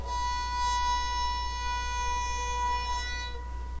Tenor Nyla B5
A bowed banjo from my "Not so exotic instruments" sample pack. It's BORN to be used with your compositions, and with FL Studio. Use with care! Bowed with a violin bow. Makes me think of kitties with peppermint claws.
Use for background chords and drones.